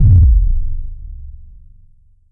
Noise bd3
Noise bass drum long
drum, industrial, kit, noise